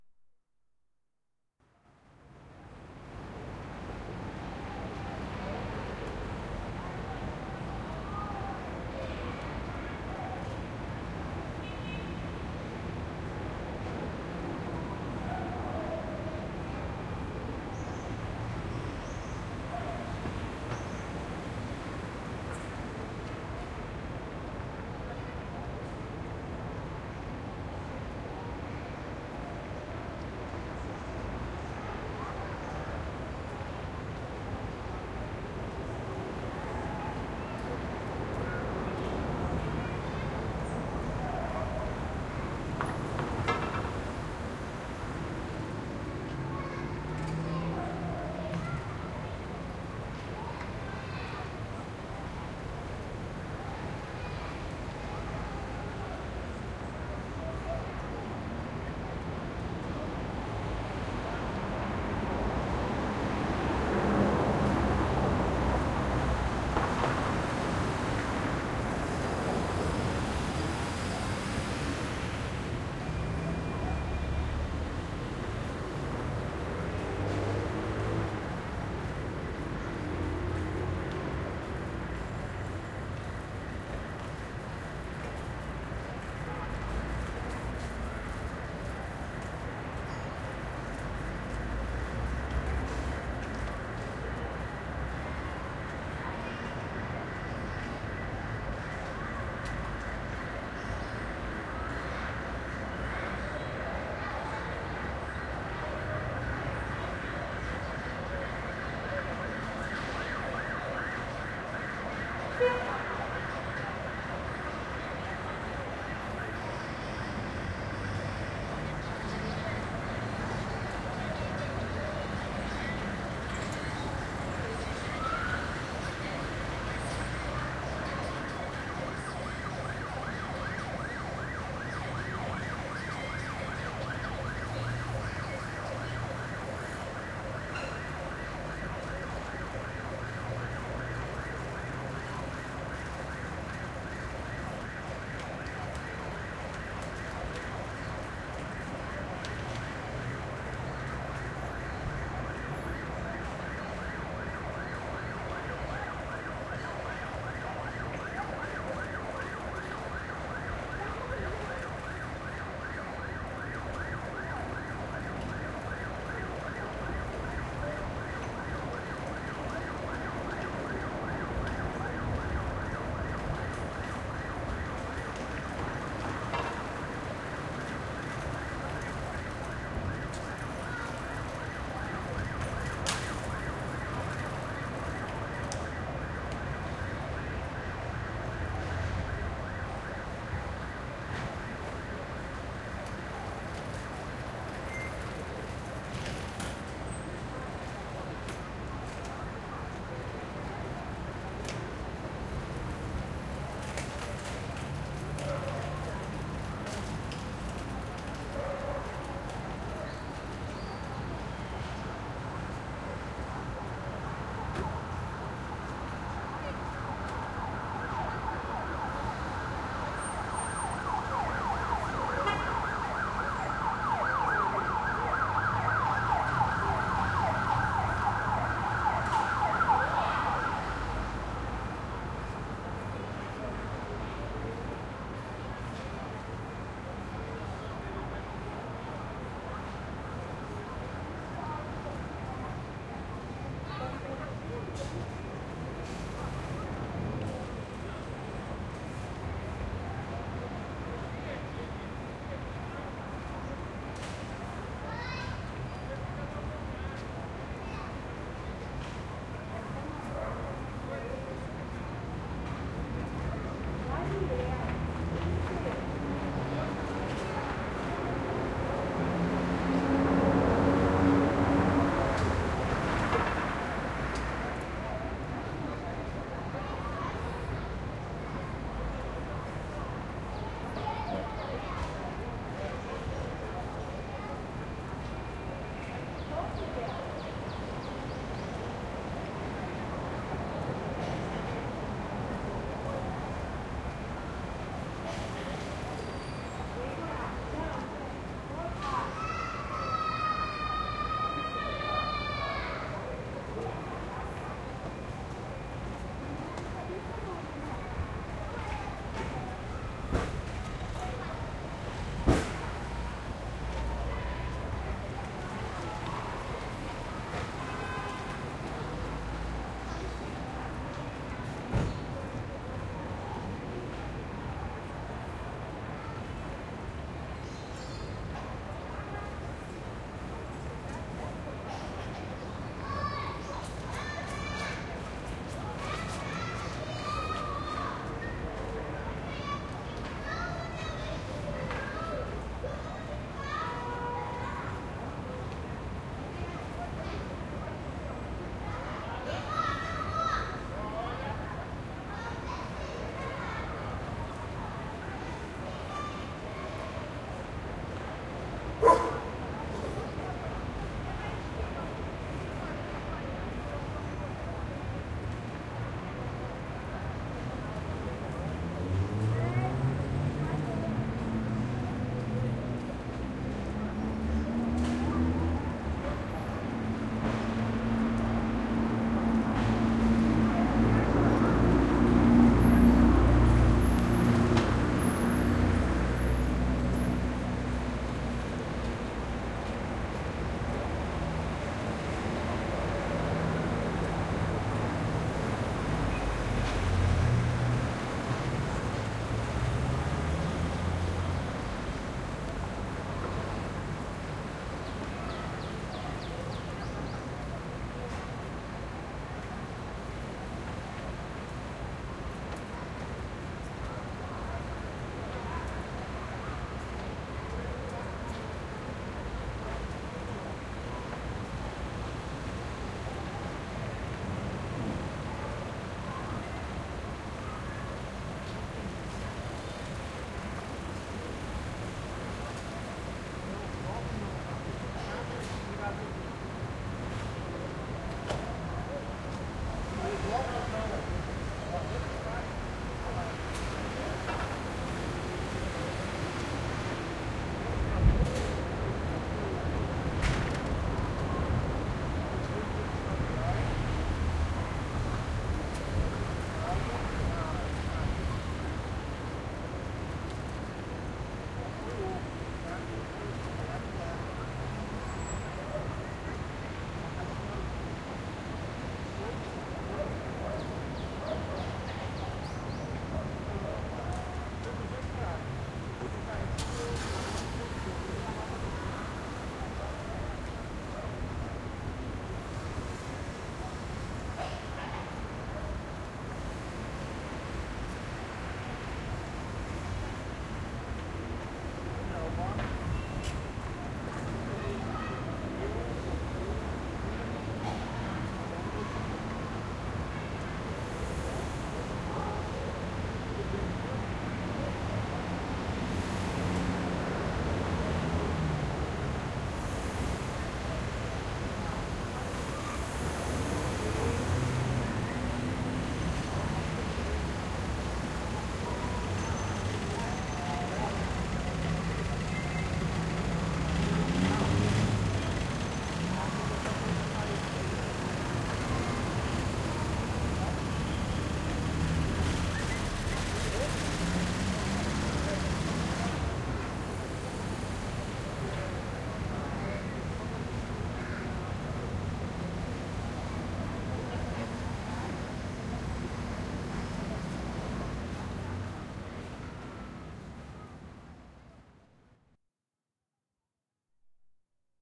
Field recording from my street, in Rio de Janeiro. Recorded with Sound Devices 552, one Sennheiser MKH 416 on the left, one Schoeps MK41 on the center and another Sennheiser MKH416 on the right. Medium traffic, people talking, dogs, sirens and kids playing.